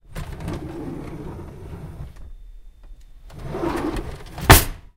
drawer open close 01
drawer open close
close, drawer, open